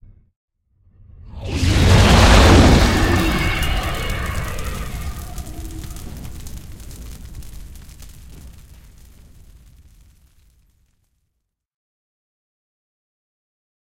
Fire Swish Monster Truck
Whooosh, Slam, THIS SUNDAY at the SILVERDOME!!! Meant to emulate those cheesy fire effects from monster truck commercials.Layered synths, recordings of fire, processed with Metasynth, Reaktor, GRM Tools, and Mastered in Logic 7 Pro
commercial, competition, fire, monster, rally, swish, truck